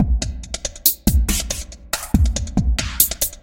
70 bpm drum loop made with Hydrogen
beat, electronic